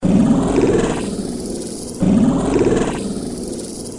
sound-design created from processing a field-recording of water recorded here in Halifax; processed with Native Instruments Reaktor and Adobe Audition
processed, water, field-recording, rhythmic, electronic, sound-design, loop, 2-bar, industrial, ambient